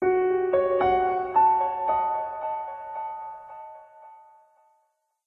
Question mark with affirmative end, part of Piano moods pack.
calm, delay, mellow, mood, phrase, piano, reverb